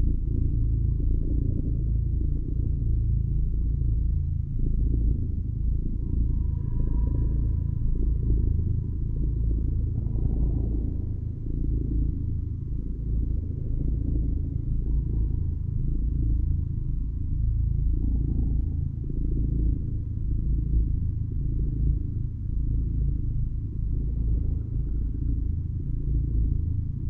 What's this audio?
kerri-cat-loopable
This is fully loopable version of it (no fade in/out needed).